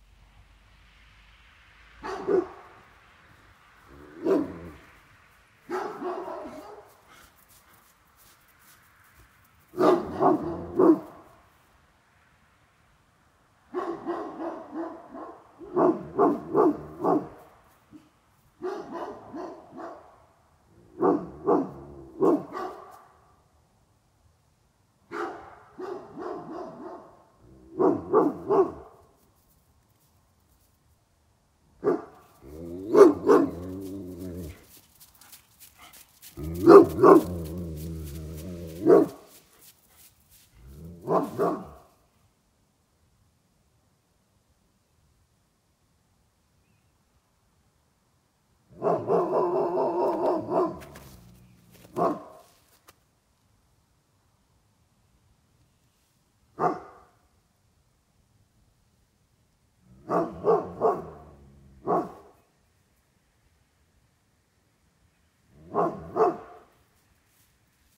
Irish wolfhound & Finnish hound barking at Pukinmäki dog park in Helsinki. Phone recording, slightly RX:d.
bark, barking